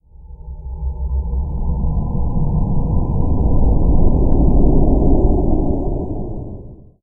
Spaceship Flyby 4
The queer spacecraft soars through deep space, its engines rumbling. If this describes your sound needs you've found the perfect sound! Could also pass as a ambient effect. Made by paulstreching my voice in Audacity. I always appreciate seeing what you make with my stuff, so be sure do drop me a link! Make sure to comment or rate if you found this sound helpful!
alien, aliens, engine, engines, fi, fiction, fly-by, flyby, future, futuristic, outer-space, sci, science, science-fiction, sci-fi, scifi, space, spaceship, starship, ufo